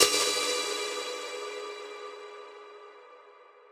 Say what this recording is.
inoe tjing - Part 3
a very nice synthetic spooky hit. made this with a reaktor ensemble.
hit, industrial, scary